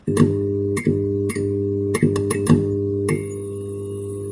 Stereo record of neon tube turned on.
click, lamp, light, neon, neonlamp, neontube